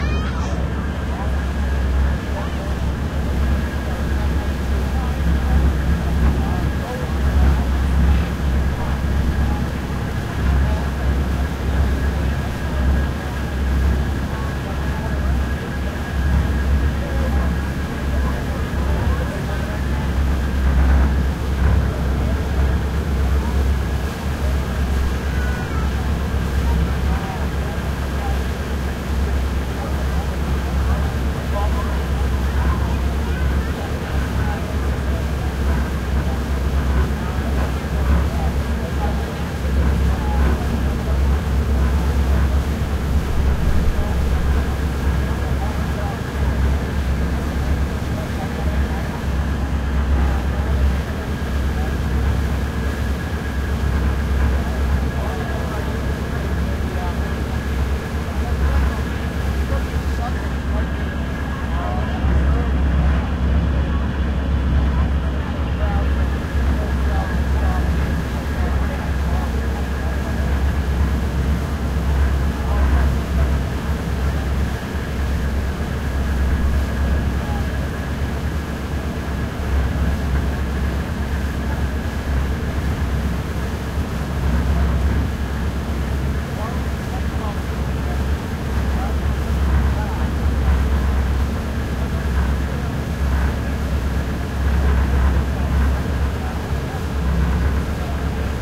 Toronto Island Ferry Crowd and Engine Noise
Toronto Island Ferry Boat Crowd and Engine Noise
(on the way to Ward's Island)